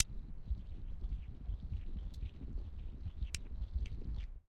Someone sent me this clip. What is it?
Two glass marbles being rubbed together. Fairly high background noise due to gain needed to capture such a soft sound. Gritty lower-pitched noisy sound is the result. Close miked with Rode NT-5s in X-Y configuration. Trimmed, DC removed, and normalized to -6 dB.
rub, marble, noisy